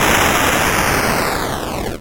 SFX Explosion 06
retro video-game 8-bit explosion